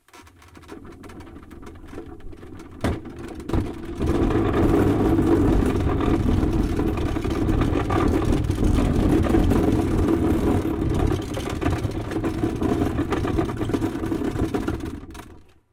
Cart Iron
A wooden book cart rolls on a rough iron library floor.
field-recording, cart